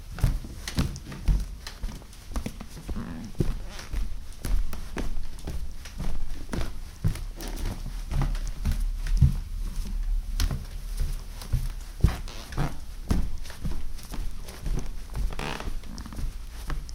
footsteps on wood with pant legs rubbing
steps aren't very isolated from cloth sound
cloth, clothing, feet, floor, footsteps, hardwood, socks, steps, walk, walking